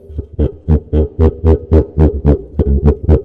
The putipù is a percussion instrument used in Neapolitan folk music and, generally speaking in the folk music of much of southern Italy. (An alternative name is "caccavella".) The name putipù is onomatopoeia for the "burping" sound the instrument makes when played. The instrument consists of a membrane stretched across a resonating chamber, like a drum. Instead of the membrane being stuck, however, a handle is used to compress air rhythmically within the chamber. The air then spurts audibly out of the not-quite-hermetic seal that fastens the membrane to the clay or metal body of the instrument.